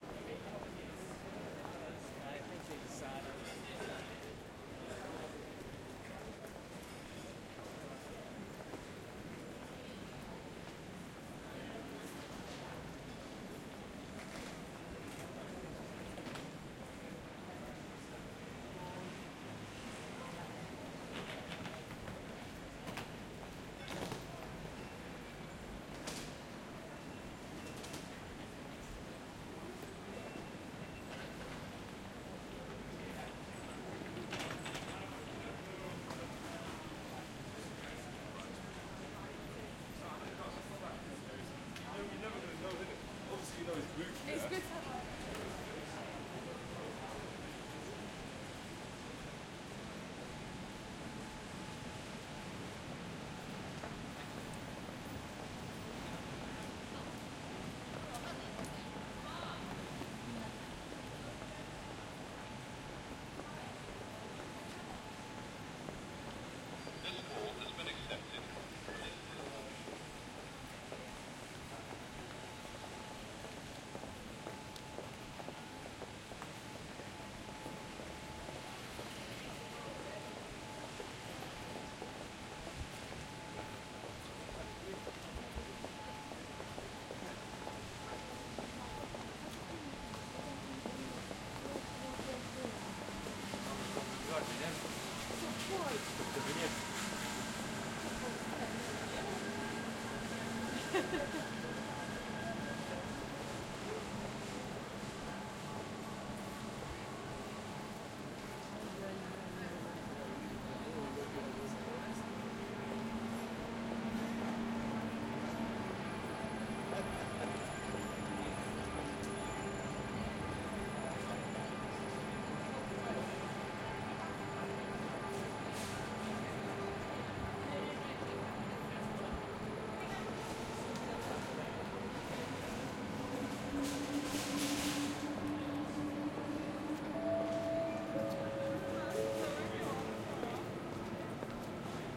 St Pancrass station int atmos
Recording inside St Pancras' train station but could be used for all kinds of large space atmos.
Equipment used: Zoom H4 internal mic
Location: St Pancras
Date: July 2015
shopping-centre, St-Pancras, train-station